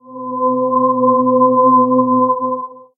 This short sample presents part of recorded voice, edited in Audacity.
It's a single note – C.